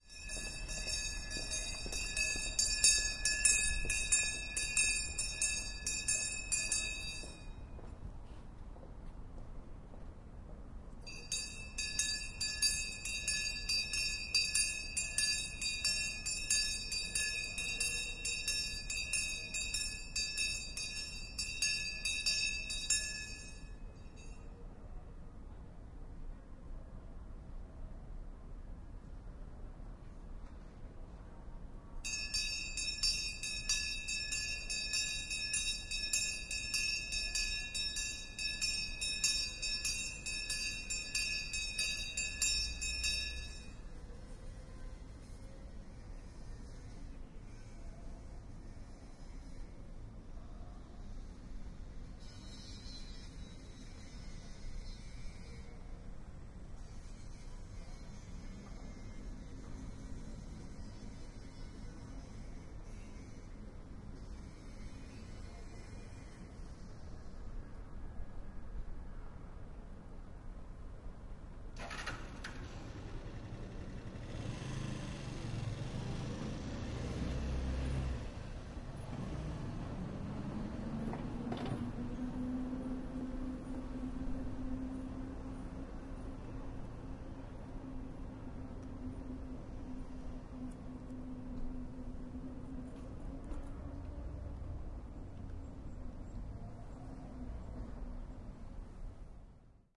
Selling eggs with a bell and a motorbike. Angle grinder in the background
20120116